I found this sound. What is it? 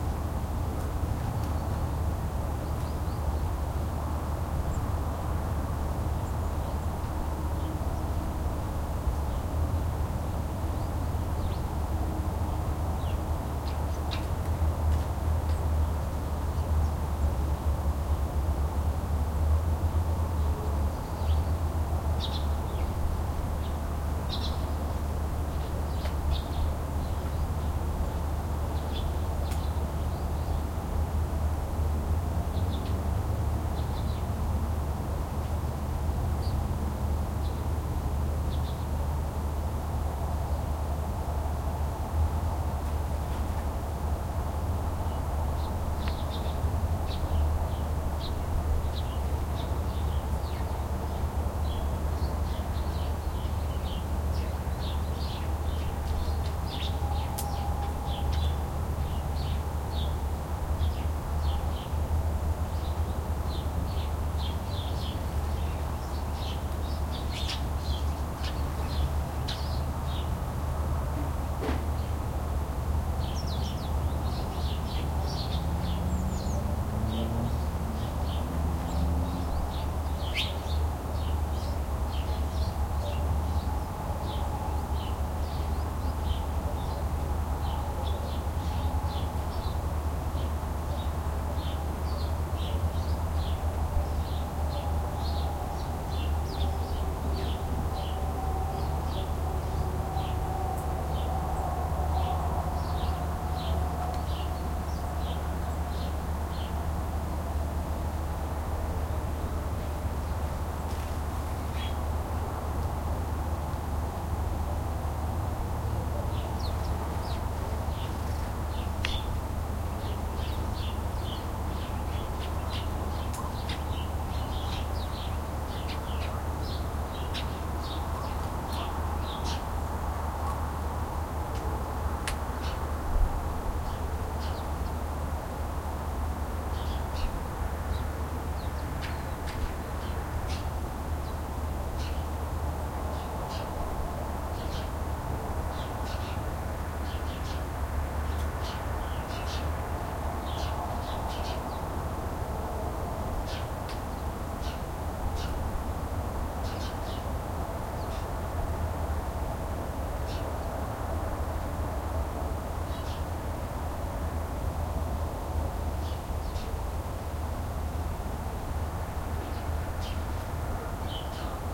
Outside the city in autumn
Some ambient sound in front of my house recorded 30.12.2013 but without any snow, so it looks (and sounds) like autumn. Recorded with Zoom H1